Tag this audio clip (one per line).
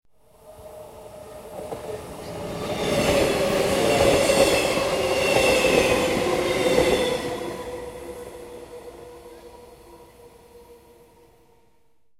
gyro
transport
doppler
rail
electric
train